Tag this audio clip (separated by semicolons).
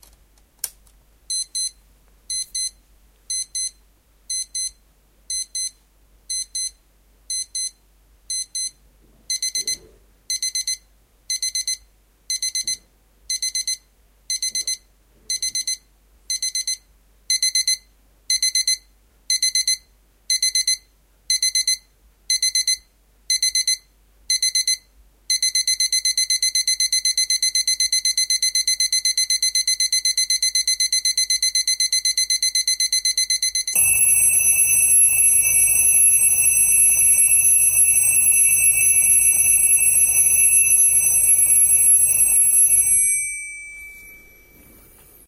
DM-550; alarm; clock; compresion; medium; olympus; recording; sample